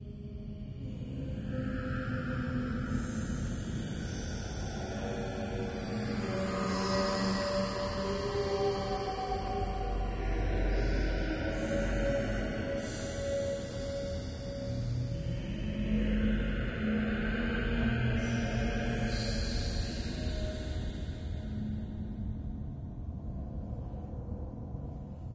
Dark Sanctuary Ambient